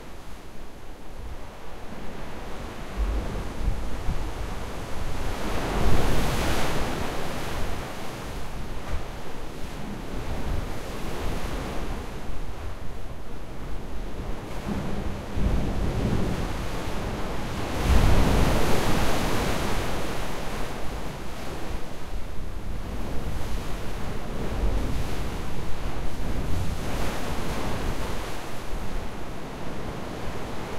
Inside a tunnel with ocean waves crashing up against it. Some wind noise.